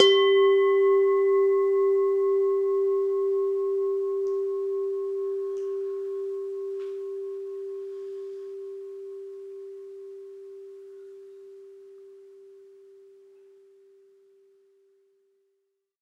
mono bell -7 G 16sec
Semi tuned bell tones. All tones are derived from one bell.